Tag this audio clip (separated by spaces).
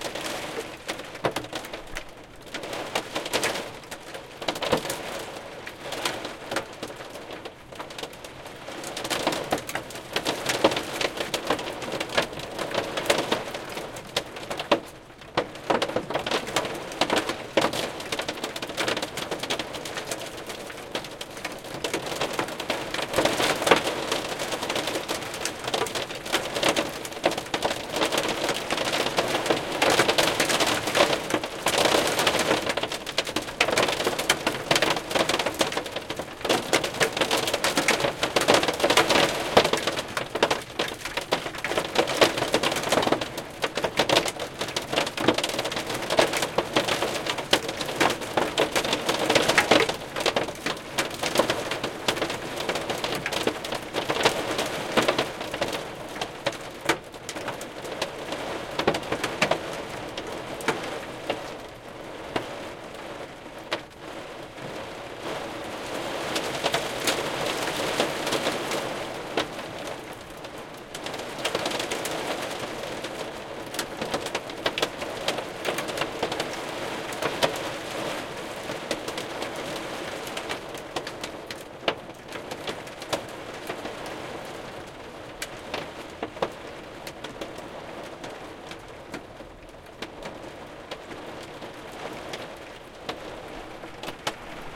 recording field storm a during hail